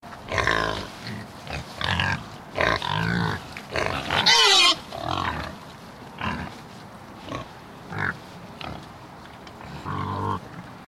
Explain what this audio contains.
Pigs oinking on a farm. The sound of a nearby street is audible in the background. Recorded with a FlashMic.
Field-Recording, Pigs, Animals, Farm